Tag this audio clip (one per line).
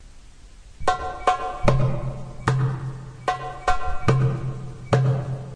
andalusian
arab-andalusian
bassit
compmusic
derbouka
moroccan
msarref
percussion
solo